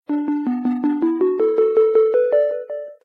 level completed
completed, game, level, over